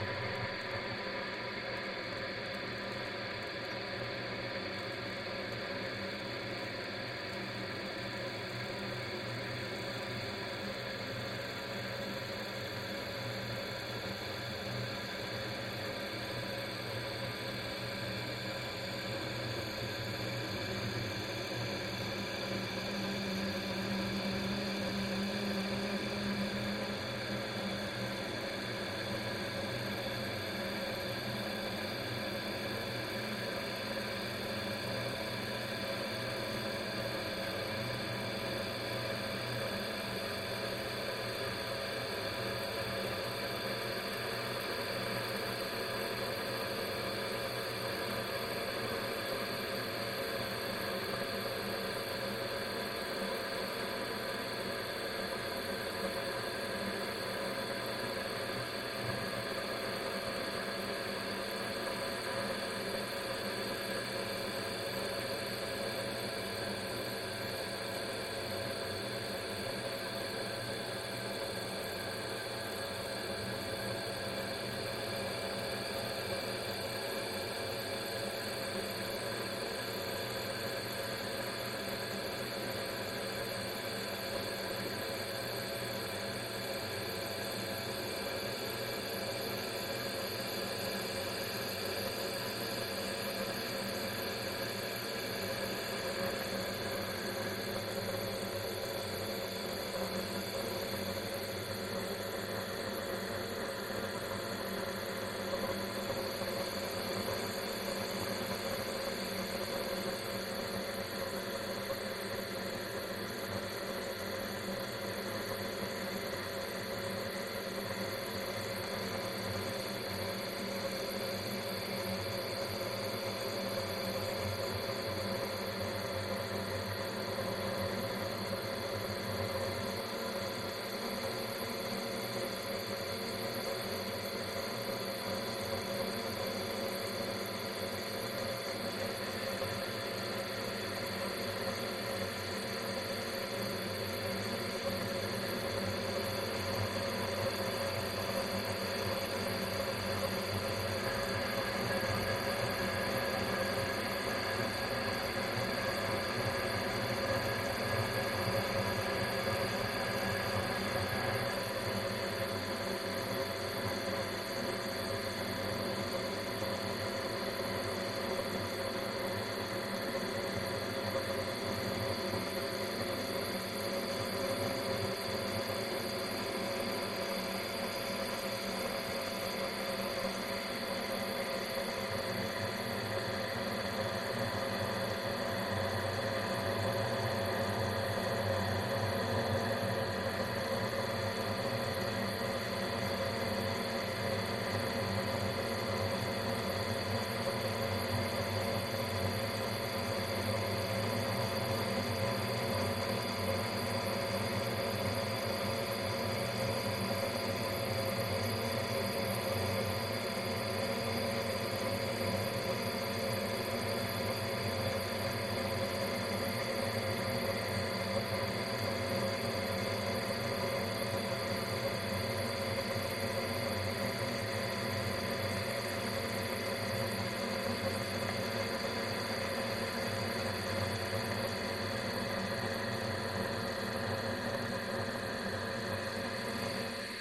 ATM CONTACT bathroom water tap-01
Contact mic attached to tap in a bathroom, you can hear many connecting water activities. Recorded on Barcus Berry 4000 mic and Tascam DR-100 mkII recorder.
atmos, atmosphere, sink, tap, through, water